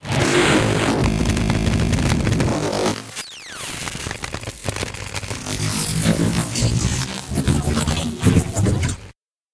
Sounds developed in a mix of other effects, such as electric shocks, scratching metal, motors, radio and TV interference and even the famous beetle inside a glass cup.